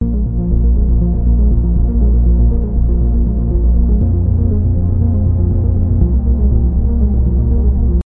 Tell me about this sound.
Suspense Pad and Bass Loop
two types of bass and a little bit of pad i put together to create a suspenseful loop. Good for a backdrop in a movie or short film, sci-fi in particular.
120-bpm, bass, battle, drama, dramatic, film, minor, movie, pad, rhythmic, scary, sci-fi, sub-bass, suspense, suspenseful, tense, thrill, thriller